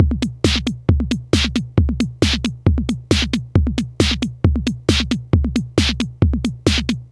TR-606 (Modified) - Series 2 - Beat 08
Beats recorded from my modified Roland TR-606 analog drummachine